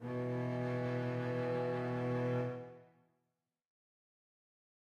Double Bass (B+5th)
These sounds are samples taken from our 'Music Based on Final Fantasy' album which will be released on 25th April 2017.
Bass, Double, Double-Bass, Music-Based-on-Final-Fantasy, Samples